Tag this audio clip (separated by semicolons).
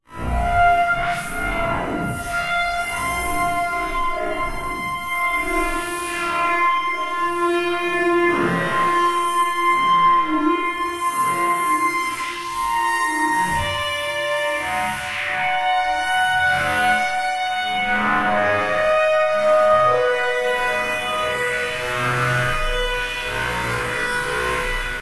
synthesized; blues; guitar; rock; psychedelic; time-stretched; Zebra; metal; electric